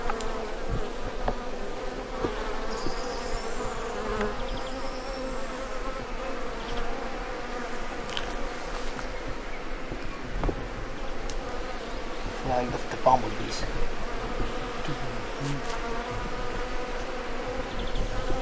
Bees around flowers
a lot of bees buzzing in a garden in mallorca